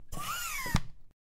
chair hydraulic
An office chair being lowered. Recorded with an AT4021 into a modified Marantz PMD661.
chair, office, piston, air, foley, mechanical, hydraulic